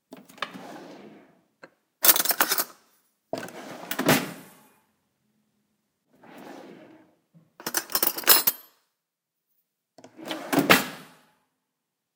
Silverware drawer, open, grab silverware, close
opening and closing a drawer with silverware sounds
close, drawer, grab, open, rummage, silverware